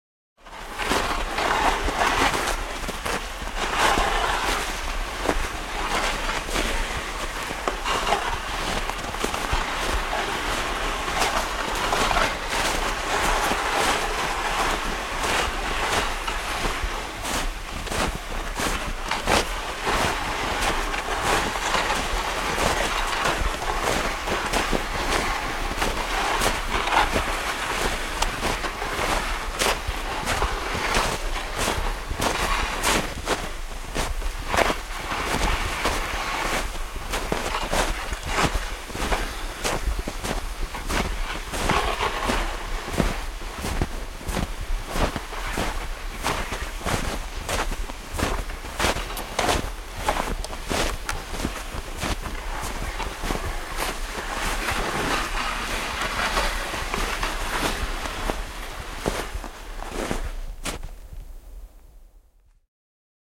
Vesikelkka lumessa / A man pushing a wooden sledge, sleigh, in the snow, footsteps and runners in the snow, a close sound
Puista kelkkaa työnnetään lumihangessa, askeleet ja jalakset lumessa, lähtö ja pysähdys, lähiääni.
Paikka/Place: Suomi / Finland / Vihti, Vesikans
Aika/Date: 16.02.1998
Lumi, Sledge, Kelkka, Pakkanen, Finland, Finnish-Broadcasting-Company, Yle, Yleisradio, Field-Recording, Frost, Winter, Sleigh, Tehosteet, Talvi, Hanki, Soundfx, Cold, Suomi, Snow